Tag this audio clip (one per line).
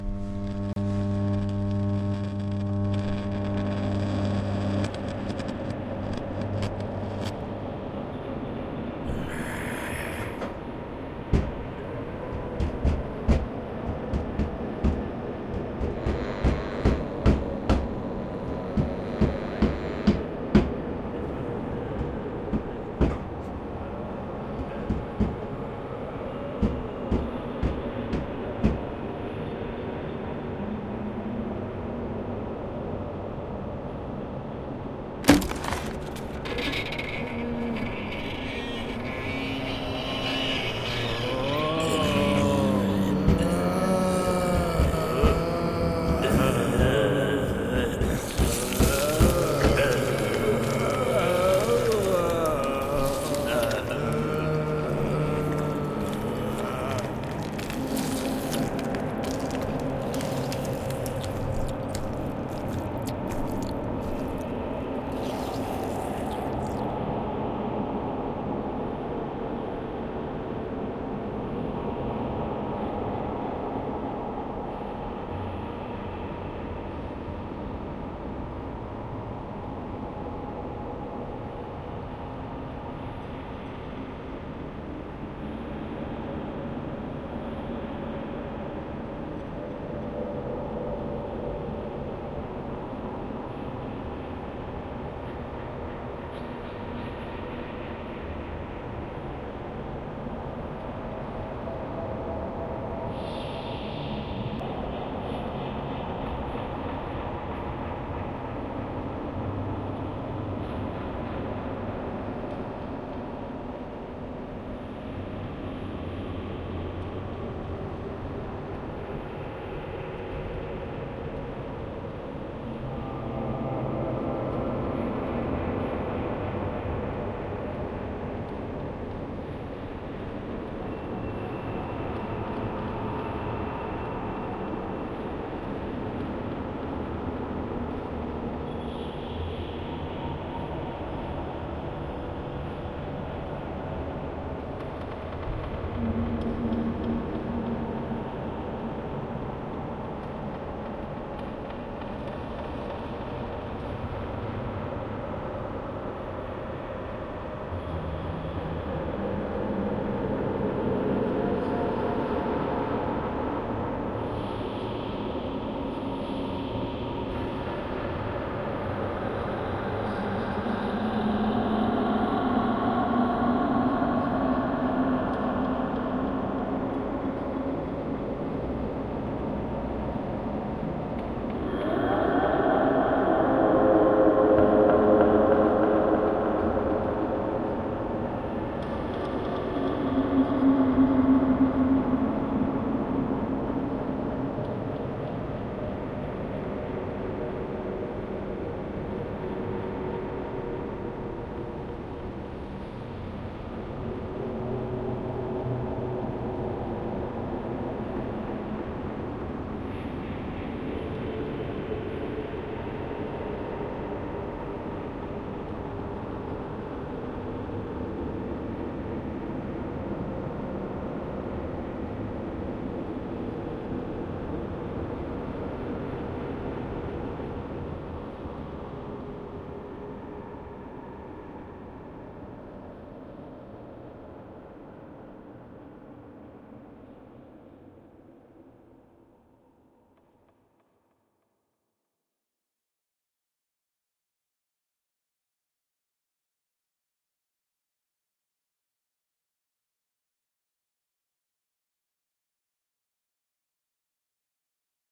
brains
flesh
gore
gross
horror
soundscape
spaghetti
squelch
sticky
zombie